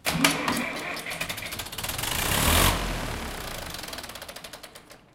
Zetor 6945 Dry Start Stop
high, low, Rev